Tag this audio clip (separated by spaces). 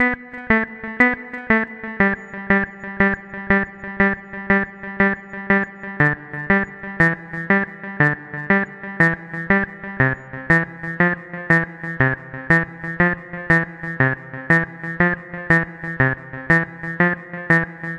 120 minimal bpm electro-house house delay synth electro